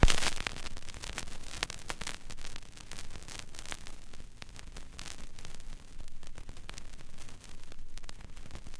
In an attempt to add warmth to my productions, I sampled some of the more distinctive sounds mostly from the lead-ins and lead-outs from dirty/scratched records.
If shortened, they make for interesting _analog_ glitch noises.
record, turntable, dust, pop, noise, static, hiss, warm, crackle, vinyl, warmth